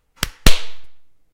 Huge Slap in the Face
body, bump, collision, face, fight, guy, hit, impact, ouch, punch, scratch, skin, slap, thud, wound